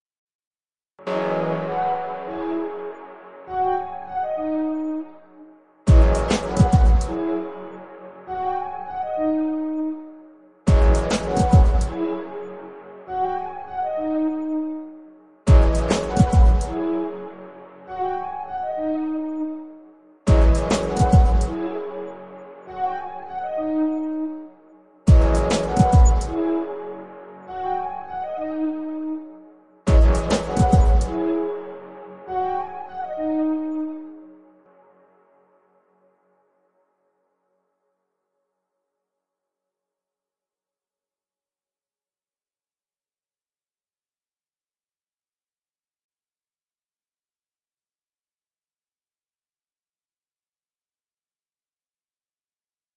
drum beat n.1
Drum samples Ableton.
drum-percussion, loop, drum-loop, rhythm, rap, beat